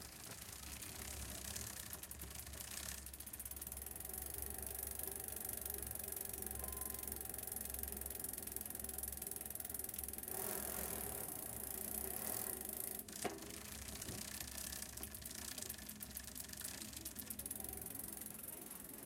Bike
OKM II binaural capsules
ZoomH5
Senheiser MKE600